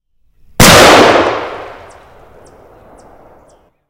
A powerful AR15 rifle being fired and recorded from a distance of about 50 yards.